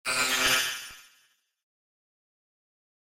I used FL Studio 11 to create this effect, I filter the sound with Gross Beat plugins.
computer, digital, electric, fx, game, lo-fi, robotic, sound-design, sound-effect